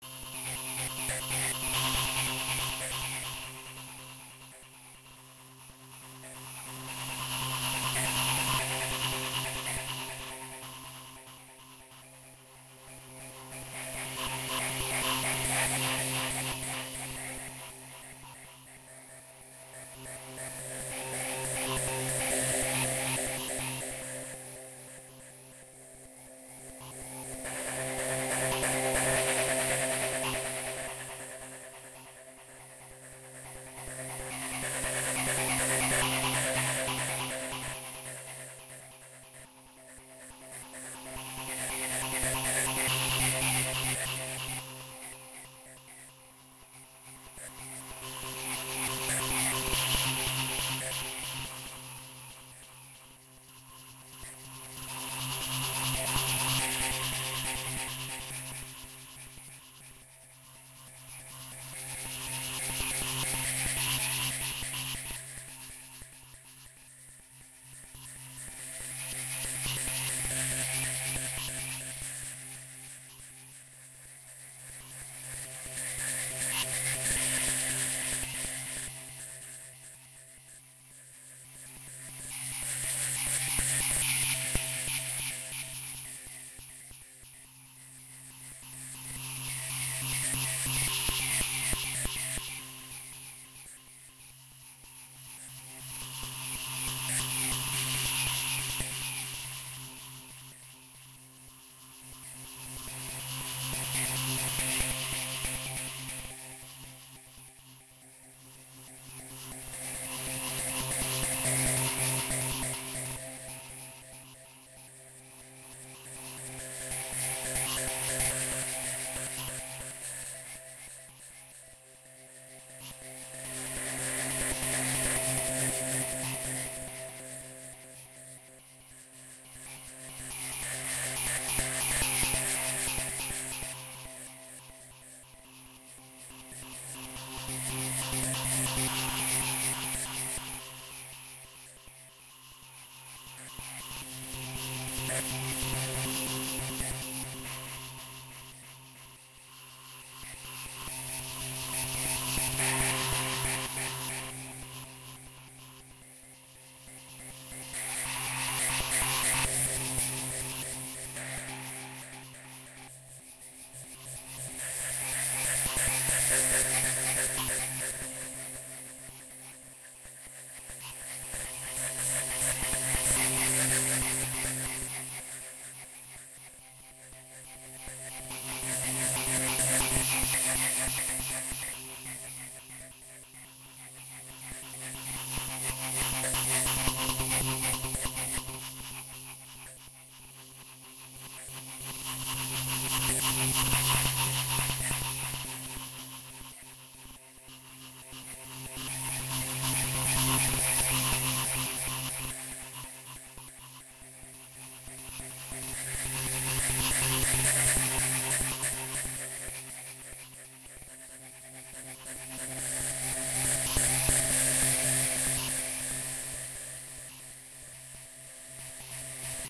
An ambient noise sound.